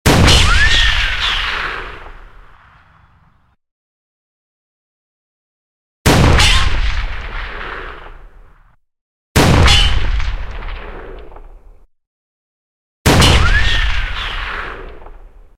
Hunk's revolver
This is a series of sound designed gun shots made to sound dramatically BIG and LOUD and very unlike what normal gunshots really sound like.